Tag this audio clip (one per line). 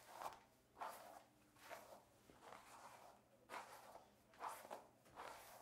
Brushing
Hair